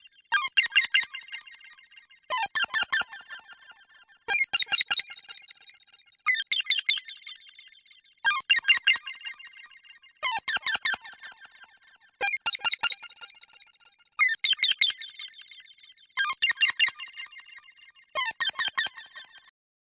SUB 37 Moog Seagull
Crying, Experimental, FX